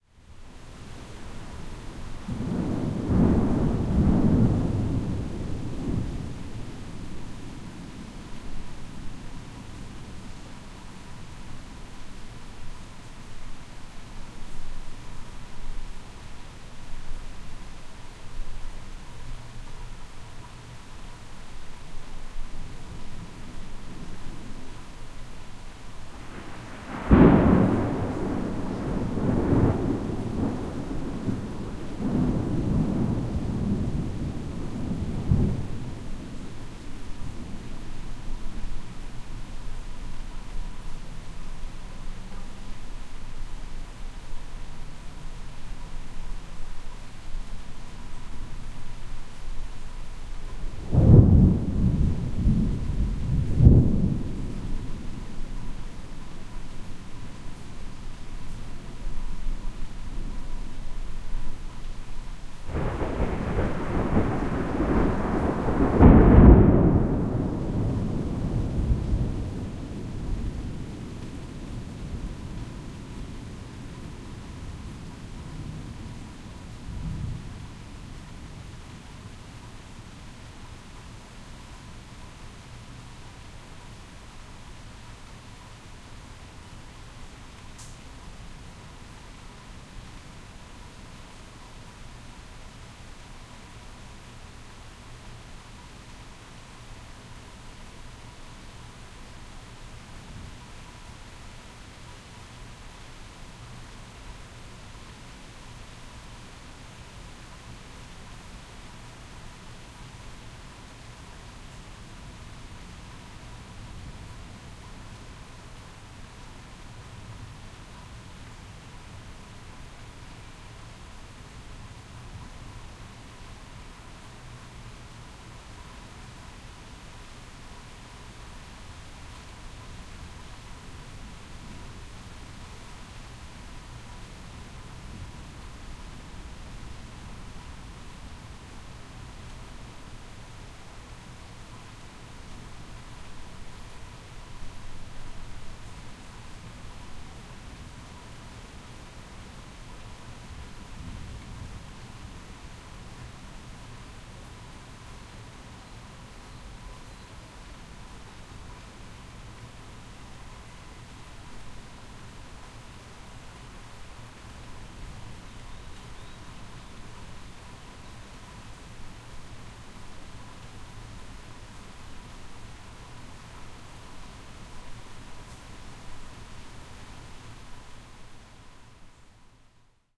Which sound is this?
STORM Thunder Rumbling and Heavy Rain in Distance HL NONE
Thunder Rumbling and Heavy Rain in Distance.
Location: Savannah, GA, USA
Recorder: Zoom F4
Mic: A pair of Line Audio OM3, in A-B placement
Recordist: Haoran Li
thunderstorm, hurricane, hailstorm, typhoon, cyclone, tornado